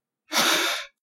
Male voice exhaling